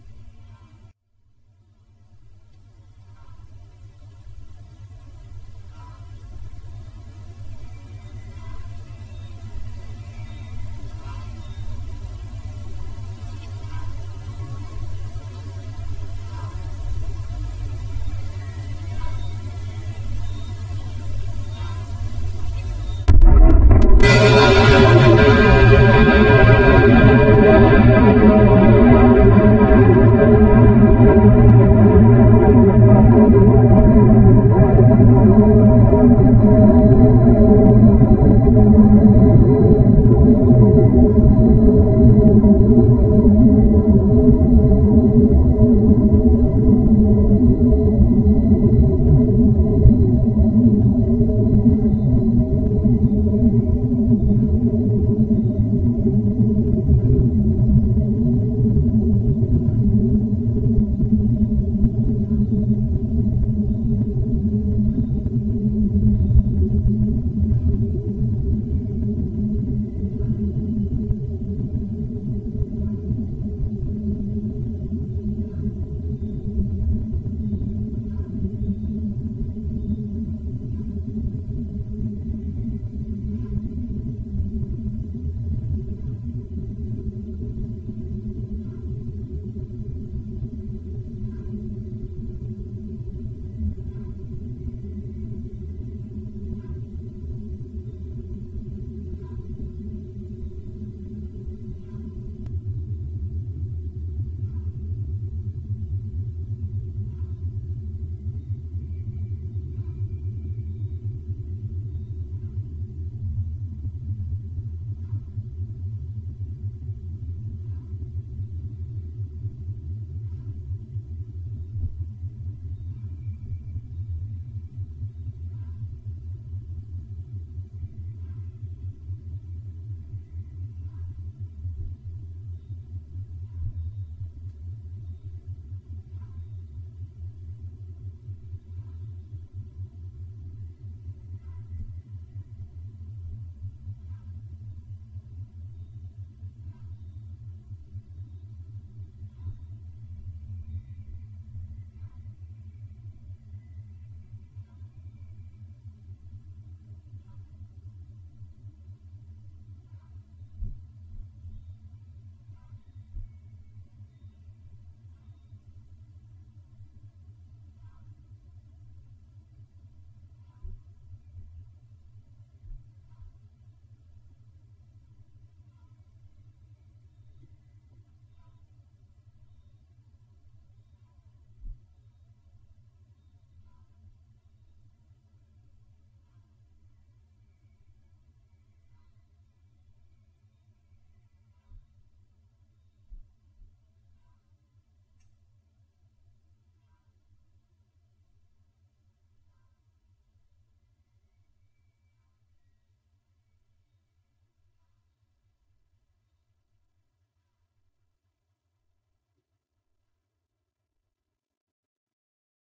YZ2bigbang
How can I describe Big Bang in a few minutes with my primitive Tools? Answer: I can't. So, skip it and let your followers listen to something comprehensive stuff. E.g. Donald Trump making a fart at the oval table. Nanana...we shouldn't forget, that we humans have a supertool: our fantasy and ability SHARE ABSTRACTIONS AND DREAMS. So here comes my Picture:
1. Before the BB some sort of activity in the nonexistent World. The scale is eons.
2. Bang! The scale is in the exp -10 something.
3. Scale is billion years. The Creation is never to stop Your Life time is so short that it is not readable. You are just a fart.
space, bigbang, theory, Creation, sci-fi, fantasy